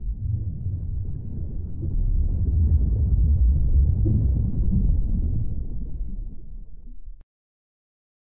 Underwater Rumble
bass, low, Underwater, water, rumble, dark, deep, low-frequency